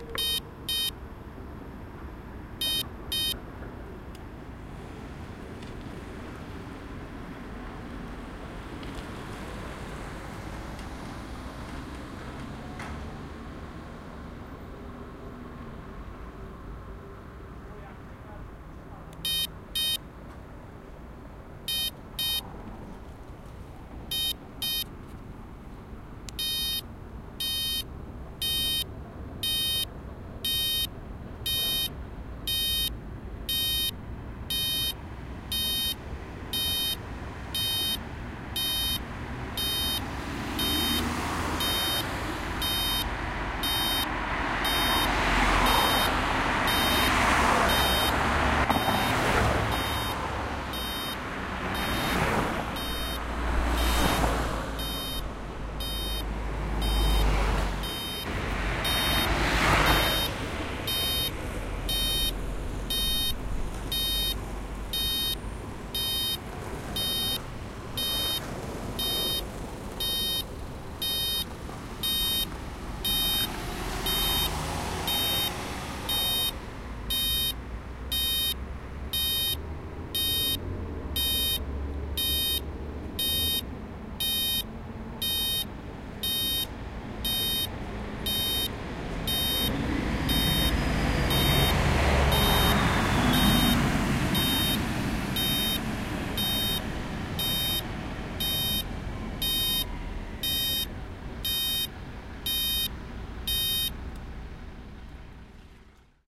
zebra crossing 120711
12.07.2011: about 23.30. the zebra crossing on Niepodleglosci Av. (Poznan in Poland). in front of Economic University. The sound of beeping traffic lights.
beeping, cars, field-recording, noise, poland, poznan, signal, traffic, zebra-crossing